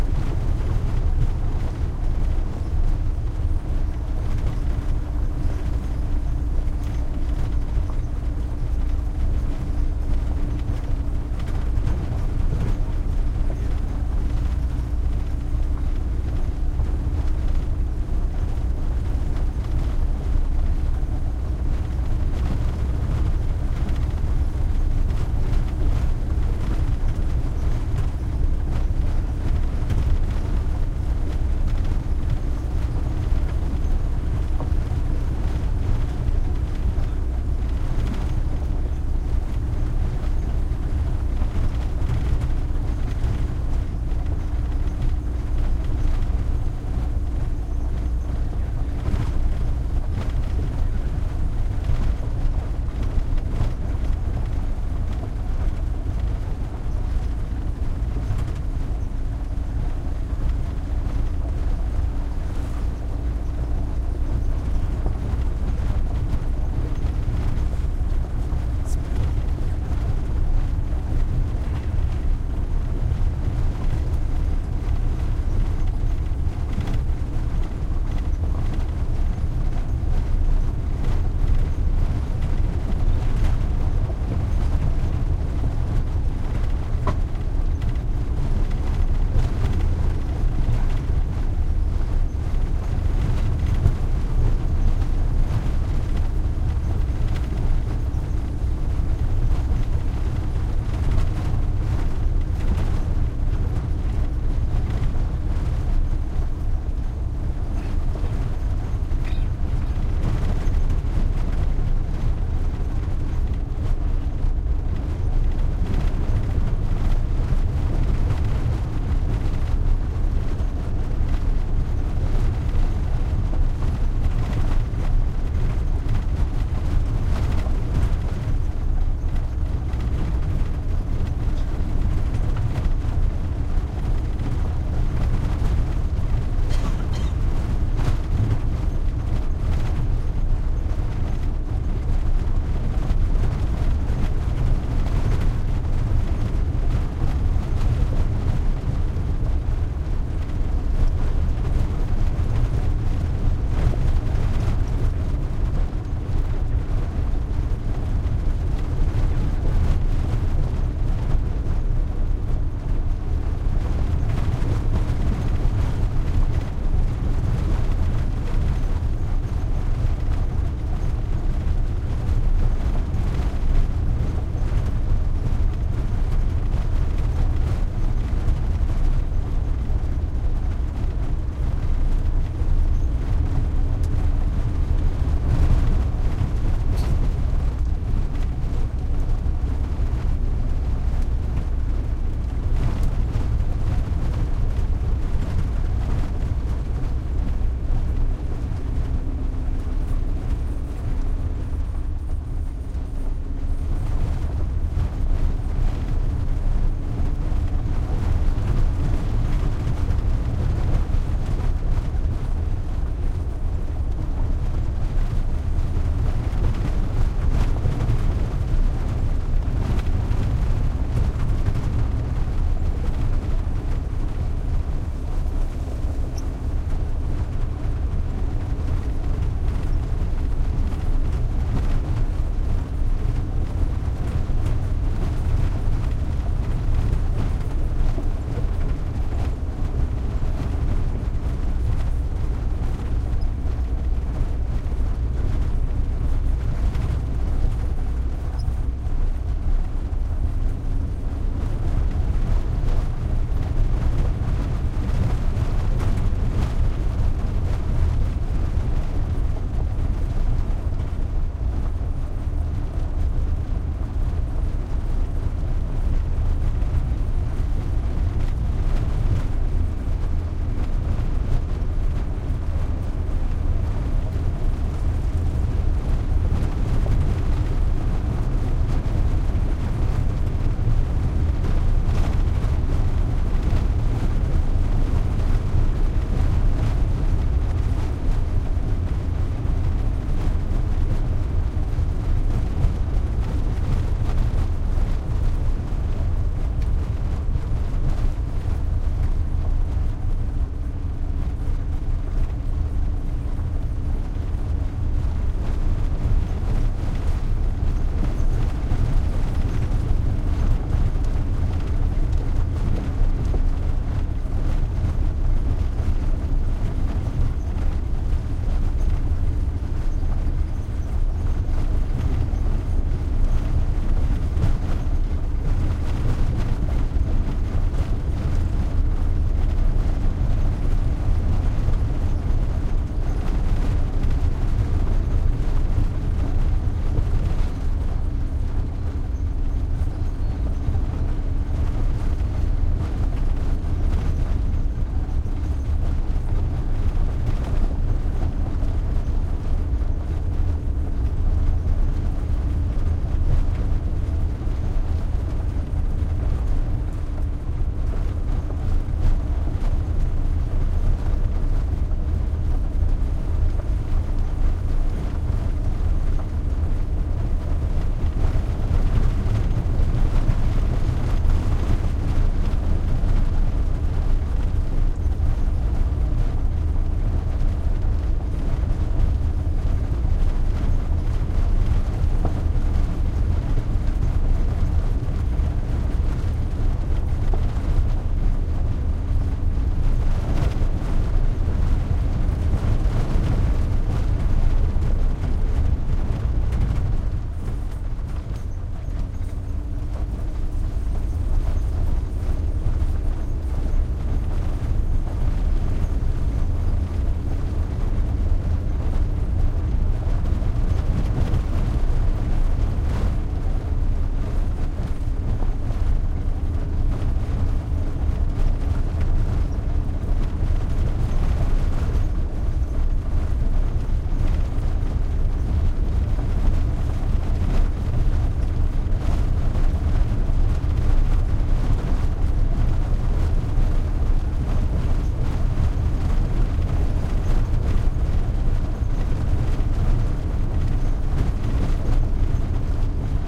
auto truck van int driving medium speed bumpy dirt road3 long
auto, truck, medium, road, bumpy, driving, speed, int, van